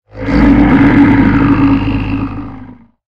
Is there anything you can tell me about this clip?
Roar of Mallan-Gong - giant monster platypus.
Mixed from the bear roar and didgeridoo sound.